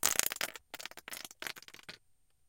One day in the Grand Canyon I found a deep crack in a cliff so I put my binaural mics down in it then dropped some small rocks into the crack. Each one is somewhat different based on the size of the rock and how far down it went.